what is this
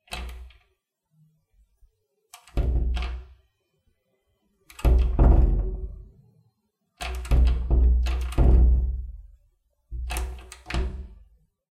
Air and a Door
Door making sound by wind
air, ambient, noise, wind